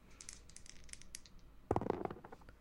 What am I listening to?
Dice Roll 2
Roll, Dice, Die